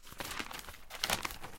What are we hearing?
newspaper opening up, Neumann U-87, ProTools HD
newspaper, pages, turn